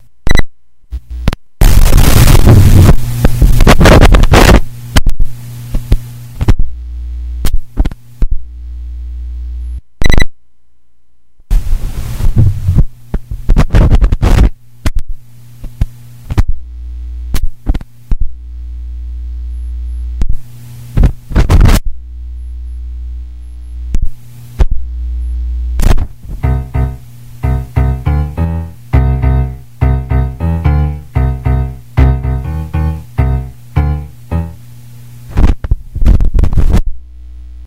Diferentes ruidos electromagnéticos. Various electromagnetic noises.